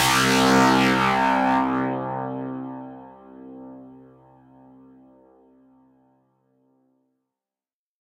This sound was created using ZynAddSubFX software synthesizer.
Basically it's a distored 'pluged string' sound.
I used the integrated wave recording to sample the notes.